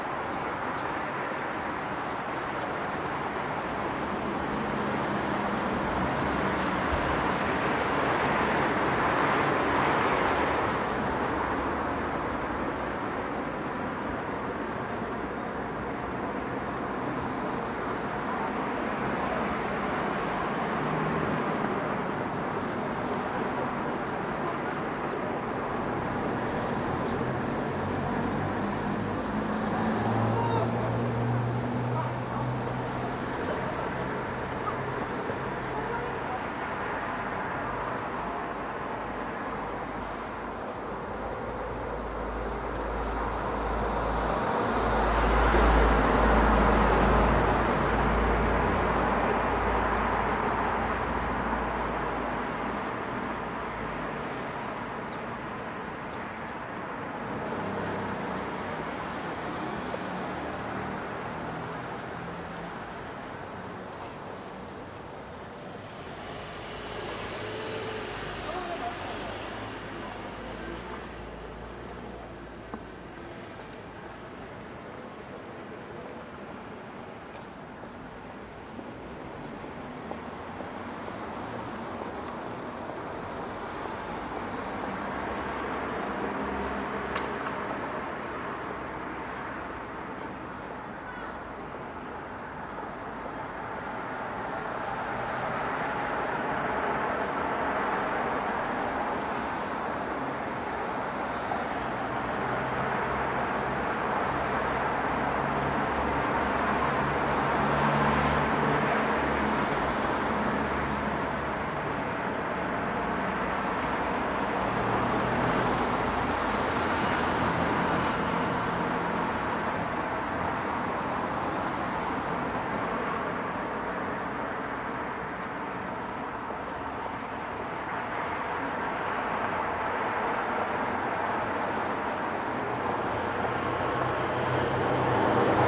Near Sannullim theater.Traffic very close.
field-recording, Hongdae, Korea, korean, Seoul, street, traffic, voices